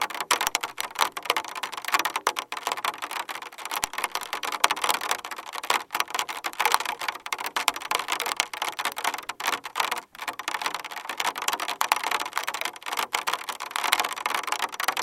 rain-on-plastic-container
Using a contact mic placed onto the lid of a large plastic trash container to record the rain as it hits the lid
Contact Mic
flood, drizzle, Rain-on-plastic, monsoon, contact-mic, precipitation, torrent, cloudburst, pinging, water, tapping, dripping, splashing, ticking, field-recording, pouring, ping, raining, raindrops, rain, rainstorm, Astbury, rain-on-trash-can, deluge